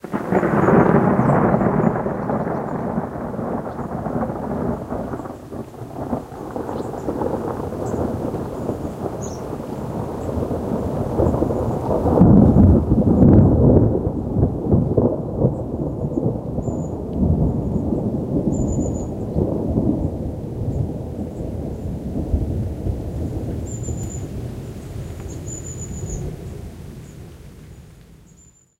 One of the close strikes from a storm on the 29th of July 2013 in Northern Ireland. Recorded with a Rode Stereo Videomic pro.
Storm, Thunderstorm